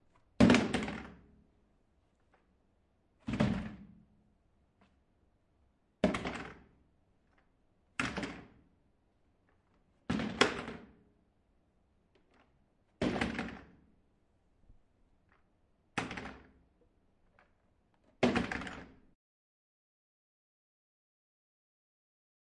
Bucket Dropping
Recorded using a Zoom H6. A plastic bucket is dropped from a distance falling onto a cemented floor.
Landing, Impact, Falling, Metal, Fall, Boom, Hit, Plastic, Dropping, Floor, Bucket, OWI, Crash